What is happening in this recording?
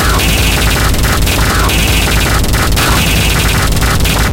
Terror Electronic Noise
Harsh digital fuck-up.
destruction, digi, digital, digital-noise, electric, electricity, error, harsh, noise, noisy, painful, rhythmic-noise, static, terror